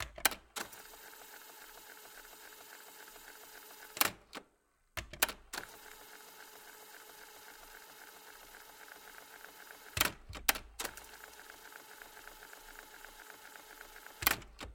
tape cassette machine Tascam 424mkiii fast forward
Fast forward sounds from the listed cassette recorder
cassette, button, tape, sfx, machine